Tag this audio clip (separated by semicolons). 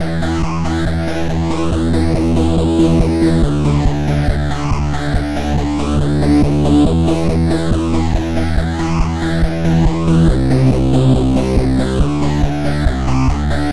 core; guitar; fast; buzz; rock; passe; trance; house; 140; bpm; hard; techno; cool